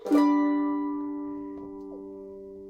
Mandolin Strum High G Chord
me playing my weber mandolin with a high g strum
chord, folk, g, major, Mandolin, strum